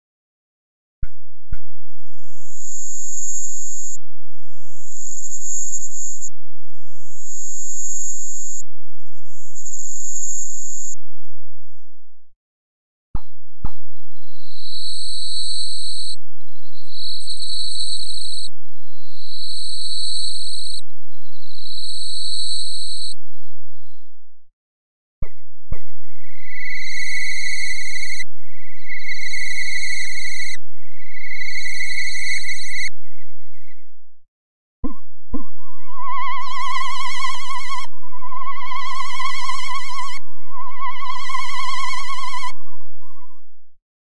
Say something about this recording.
Just a patch that I tweeked on an unremembered softsynth (analogue modeling type).